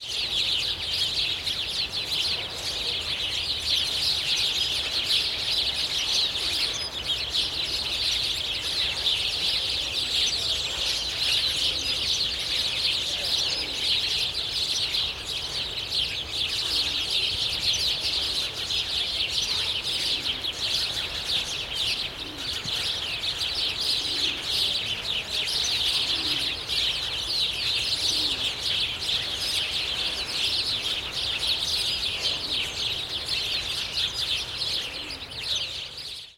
sparrows spring street
birds, city, Russia, sparrows, street, town